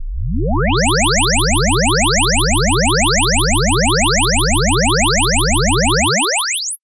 I have finally started building a saucer-shaped UFO. Unlike other designers, I've build the sound it should make first, using harmonics derived from the Mayan calender and the distances between the pyramids and the Angkor temples (well, I've still got to work out the mathematics, but I'm sure I'll find a way to make it all match). Anyway, listening to these sounds for too long will get you abducted by aliens in the near future. Or you'll wake up in the middle of the night, running circles in the corn or doing weird things to cattle. This is the sound of my UFO directing gravity waves upwards, so it's going DOWN.
alien, flying-saucer, eerie, abduction, aliens, mind-control, eery, conspiracy, ufo